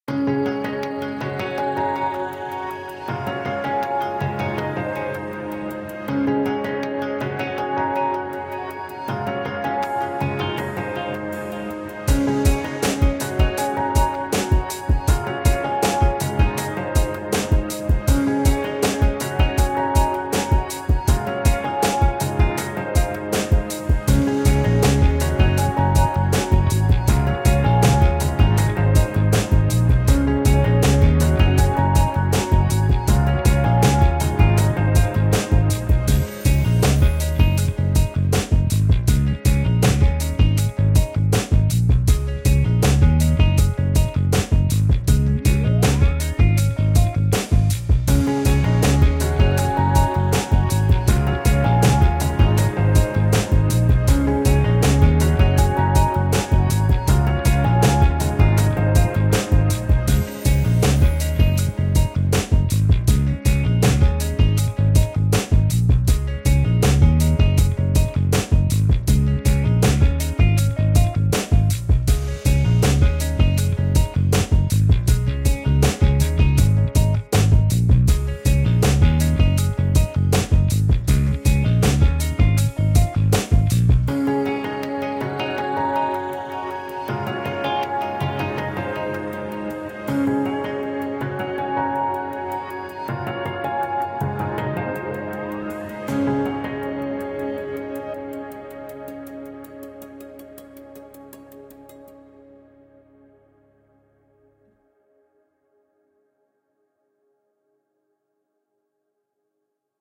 Thank you for listening.
Enhance your next project with this track featuring a catchy tune and an exuberant atmosphere, this track is a combination of pop and rock. The tune has an upbeat rhythm that is perfect for projects that are concerned with advancement and experiencing life to the maximum extent possible.
USAGE RIGHTS AND LIMITATIONS
ABOUT THE ARTIST
Creatively influenced by the likes of Vangelis, Jean Michel Jarre, KOTO, Laserdance, and Røyksopp, Tangerine Dream and Kraftwerk to name a few.
USAGE RIGHTS AND LIMITATIONS
Thank you for your cooperation.
Take care and enjoy this composition!